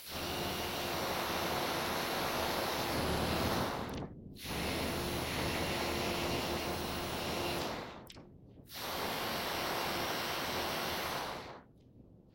motor noise
Electric motor sounds
mechanical, sounds